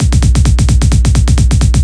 A simple Trancy Drumroll, use with the other in my "Misc Beat Pack" in order from one to eleven to create a speeding up drumroll for intros.
Trance, Psytrance